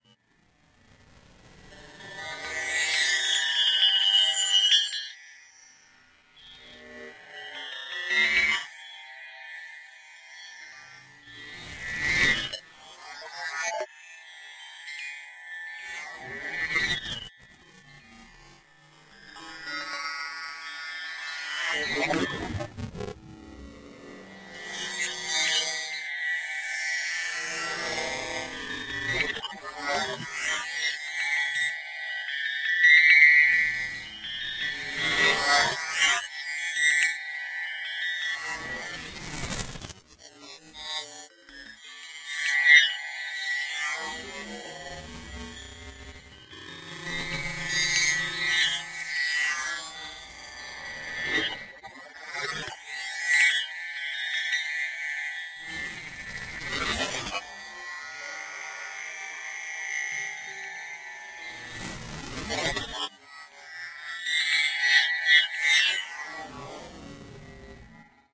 Hells Bells - 04
Bells echoing in the dark recesses of a sticky ear cavern.
ambient, grain, hells, granular, bells, dissonant, discordant, chimes, dark, distorted